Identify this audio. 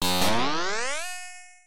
Video Game SFX - Springboard / Trampoline / Jump
A cartoony springboard / trampoline effect. Perfect for retro video games.